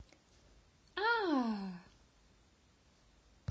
I needed that sound for an educational video. An animated woman was amazed by a fact.
rising, amazed